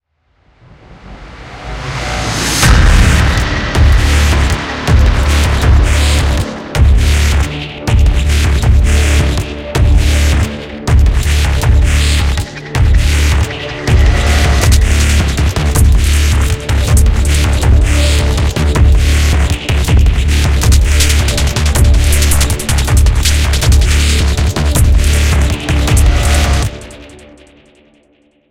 Synth Groove 2
Softsynth Polyvoks Station by Syncersoft in action.
atmosphere, cinematic, dark, electronic, music, sci-fi, synth